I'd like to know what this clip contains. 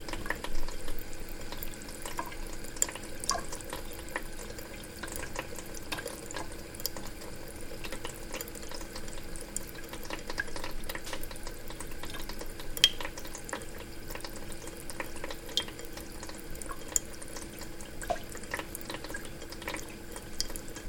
Running water out of a bathroom faucet